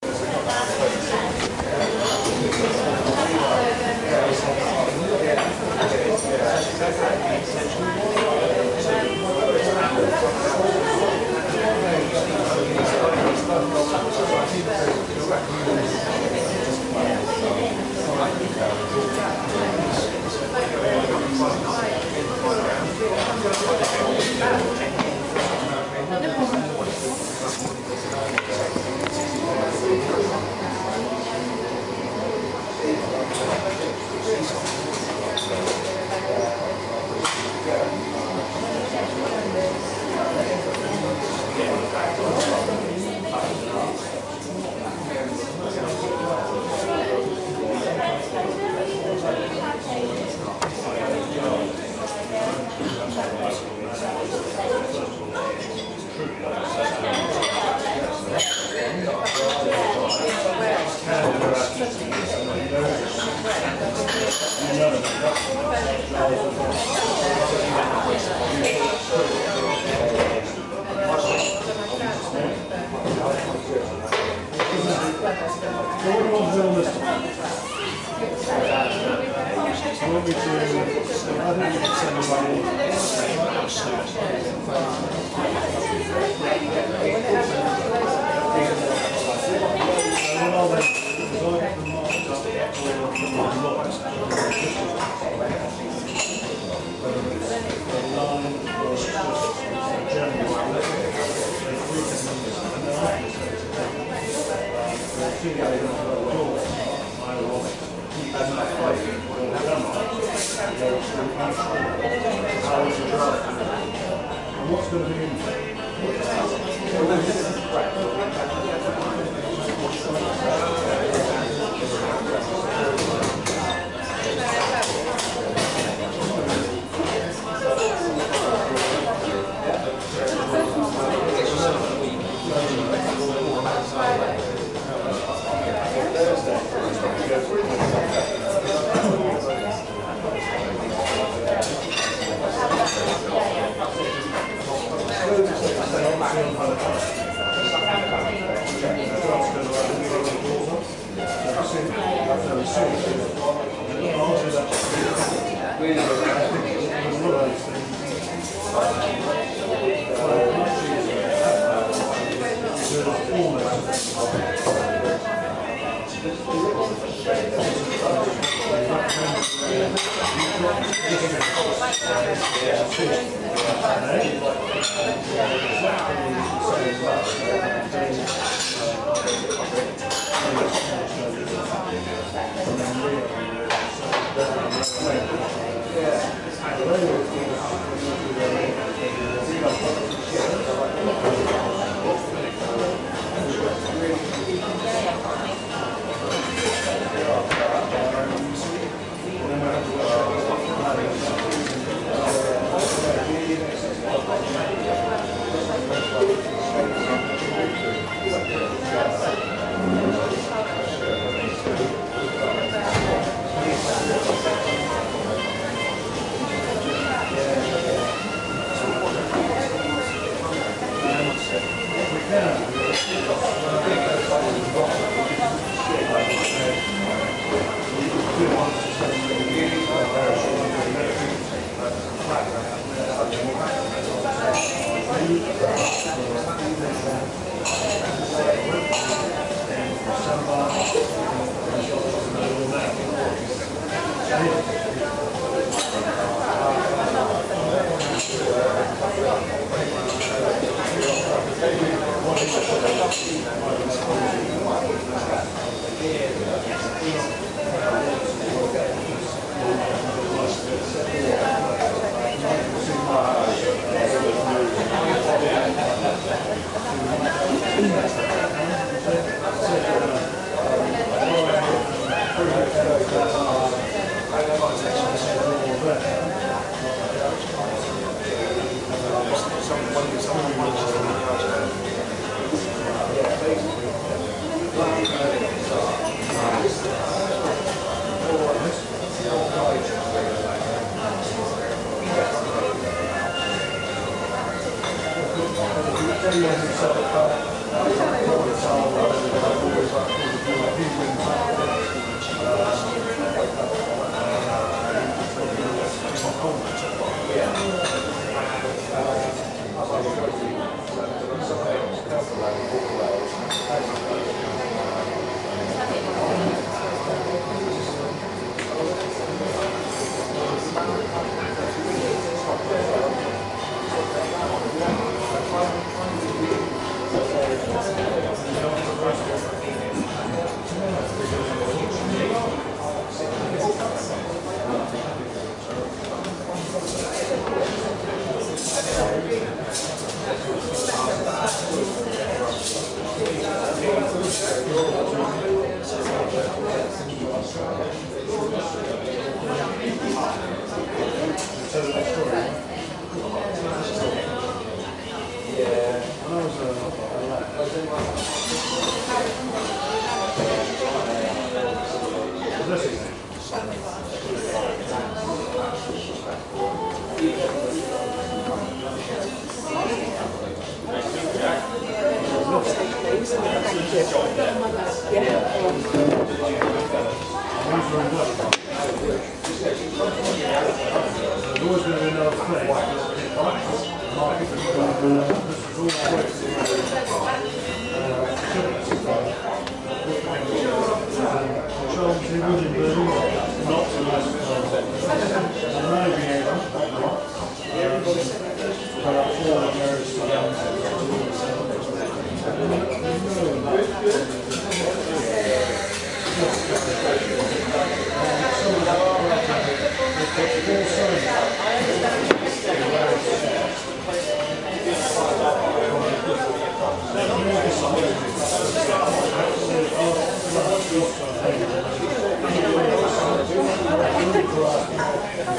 ambient-coffee-shop-sounds
Recording of the ambient sounds in Cafe Nero coffee shop in Yeovil, Somerset, England. Recorded on Tuesday 28th January 2014 using Olympus LS11 digital recorder.